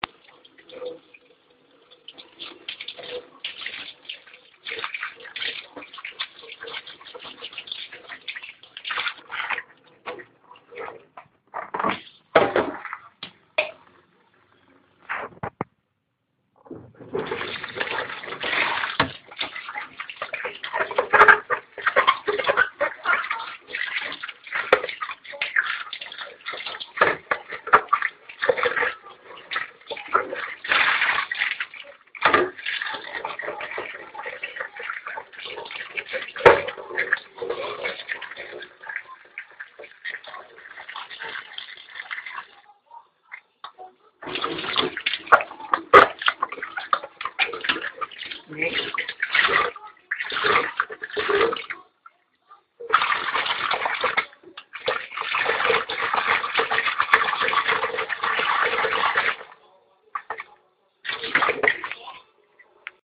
Washing the dishes
Daily activity in the morning.
Tap, Wash, Splash, Water, Kitchen, Washing, Dish, Sink